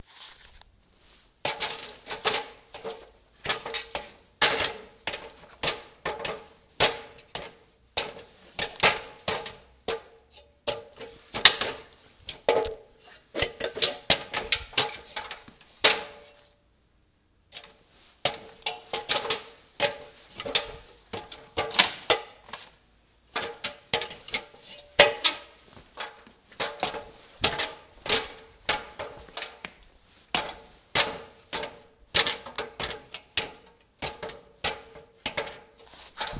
Walking up and down aluminum ladder. Recorded on EVO 4G LTE phone.